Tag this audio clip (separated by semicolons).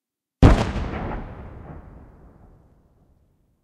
boom; explosion; war